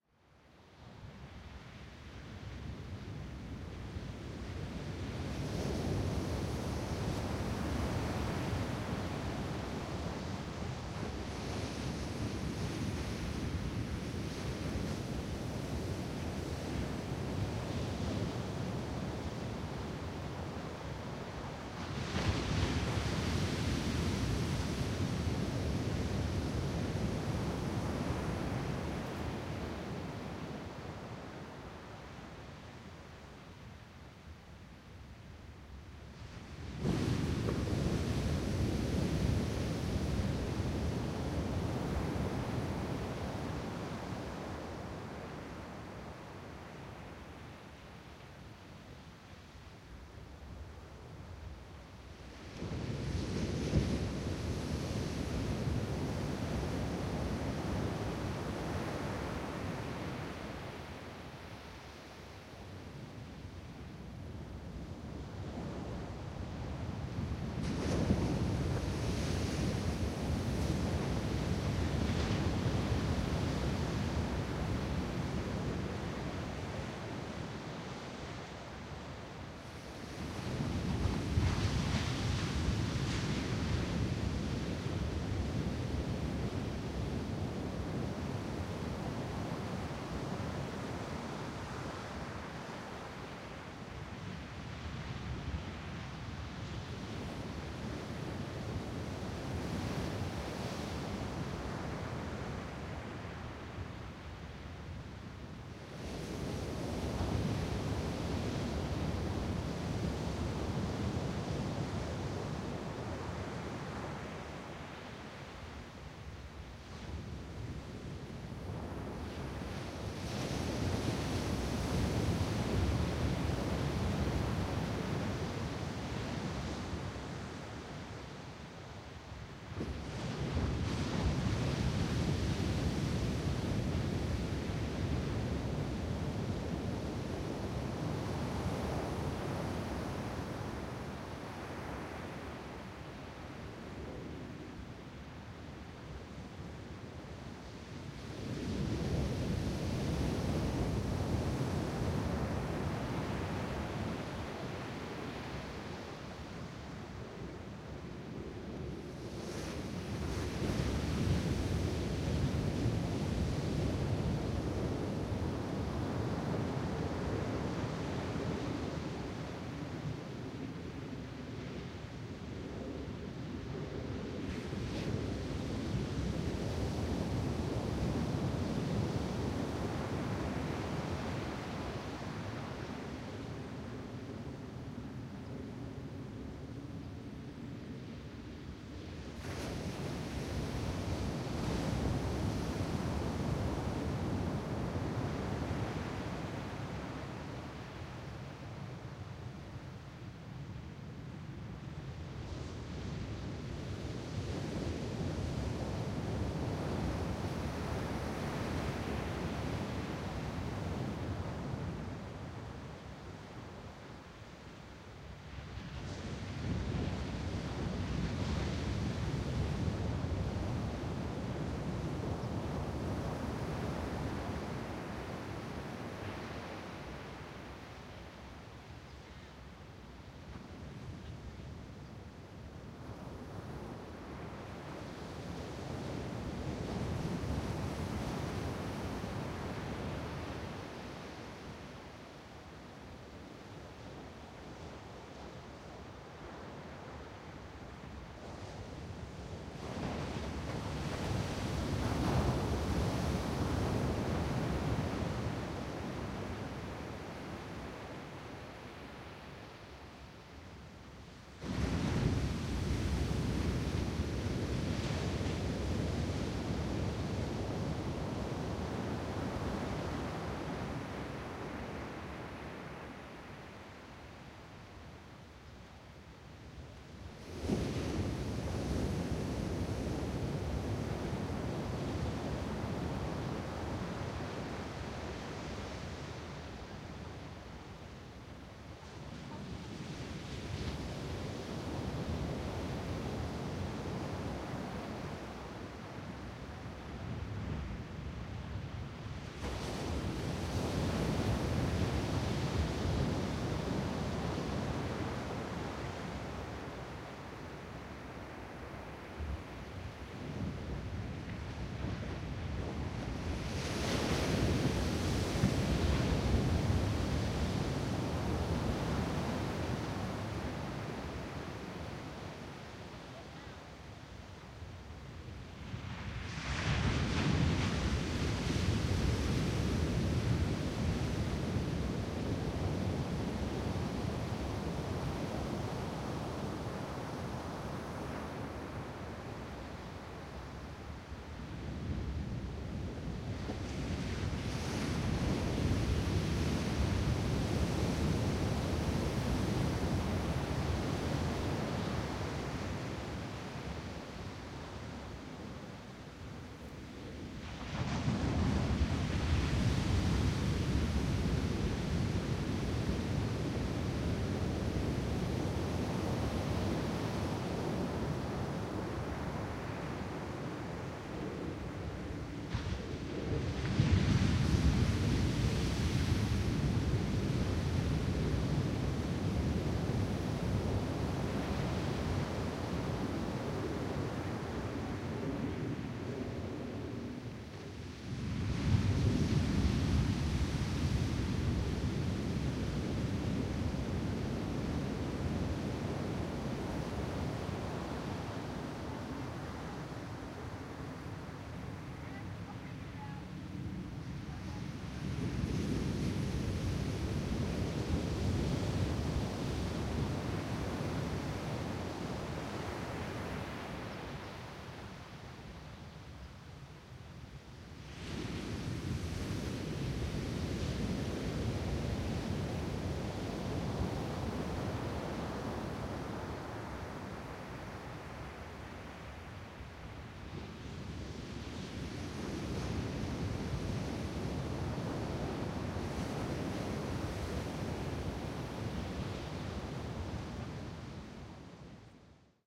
SoundField Ocean Take 1 g-format 161205
General beach ambience with waves on sand, no human noise. 5.1 surround format - recorded on 5 Dec 2016 at 1000 Steps Beach, CA, USA. - Recorded using this microphone & recorder: Soundfield ST350, Zoom F8 recorder; Format conversion and light editing done in ProTools.
beach, field-recording, ocean, waves, water